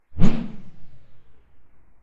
Big Swipe
Sword noises made from coat hangers, household cutlery and other weird objects.